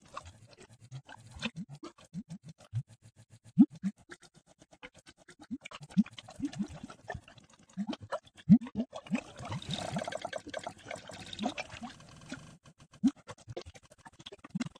This is a processed waveform of water. I made it with fruity loops granulizer. Enjoy :)